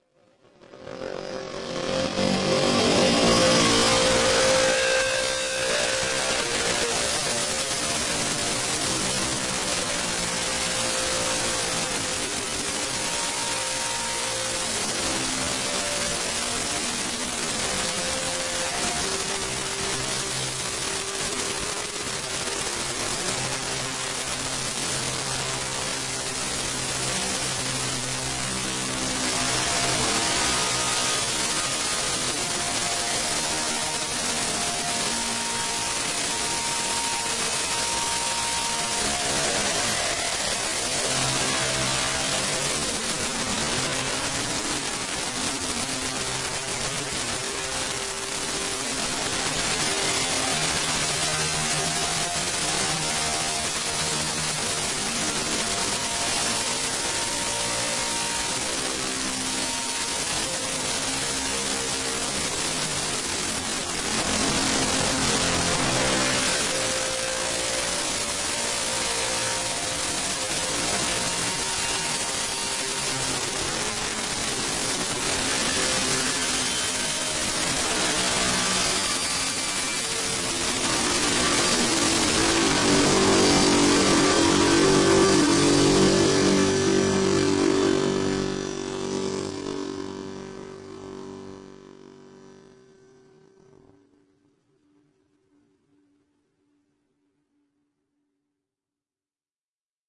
A mass of noise and artificial feedback dominating some random notes which are being played (but can't be made out) underneath. This sound was generated by heavily processing various Pandora PX-5 effects when played through an Epiphone Les Paul Custom and recorded directly into an Audigy 2ZS.